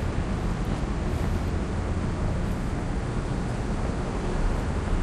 Sounds recorded while creating impulse responses with the DS-40.